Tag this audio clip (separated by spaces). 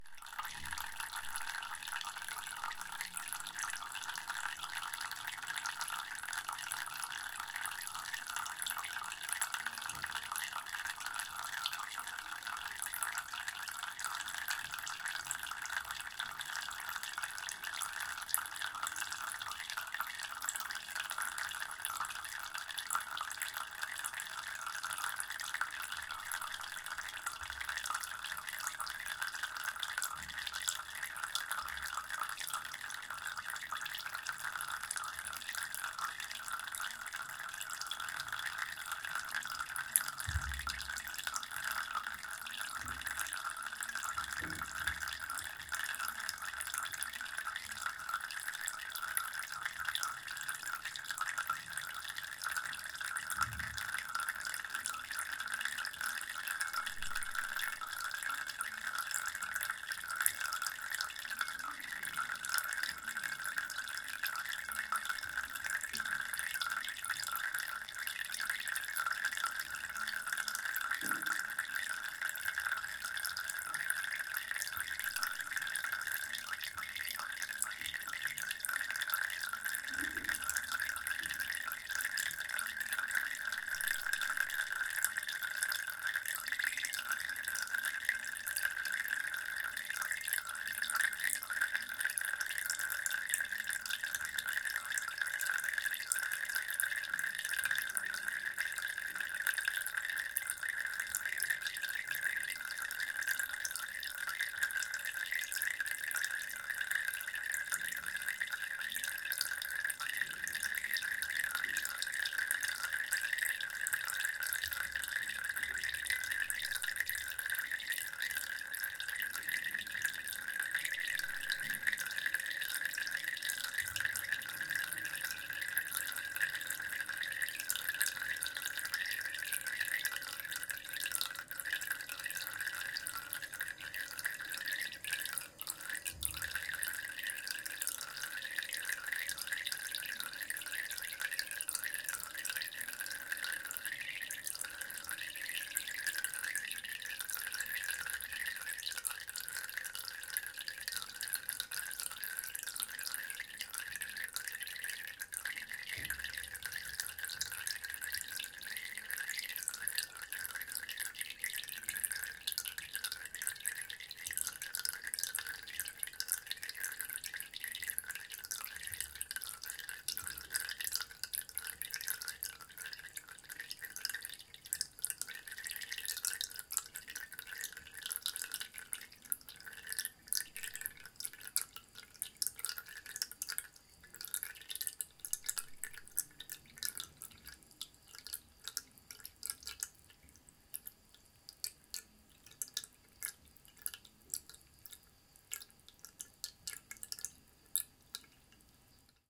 water tap drip glass